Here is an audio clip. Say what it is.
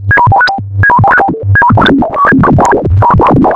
Another rhythmic sound turned chaotic. Only very narrow settings combinations are stable and produce rhythmic sounds, a minor tweak quickly turns those into bleep chaos.
Created with a feedback loop in Ableton Live.
The pack description contains the explanation of how the sounds where created.
beep, bleep, broken, chaos, circuit-bent, feedback, Frequency-shifter, pitch-tracking, rhythmic